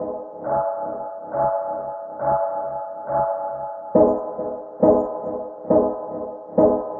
Crub Dub (Chords)
Rasta HiM Dub Roots